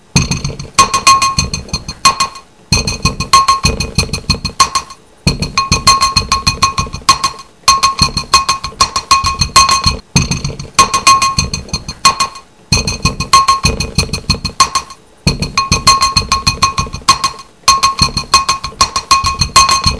banging on a ceramic bowl with a spoon in it, with a pencil, on my dining room table.
ceramic, bowl, pencil, beats, spoon